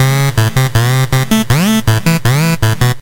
Even more loops made with software synth and drum machine and mastered in cool edit. Tempo and instrument indicated in file name and or tags. Some are perfectly edited and some are not.